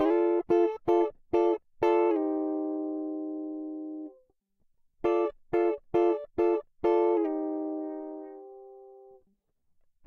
REGGAE GIT 3
REGGAE upstrokes loop
loop, reggae